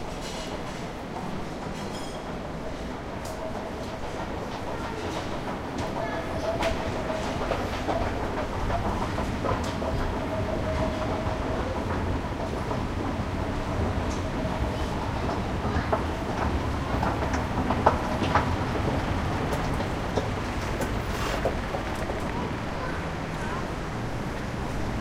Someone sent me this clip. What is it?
Karlsplatz 4b Rolltreppe
Recording from "Karlsplatz" in vienna.
escalator, field-recording, karlsplatz, people, underground, vienna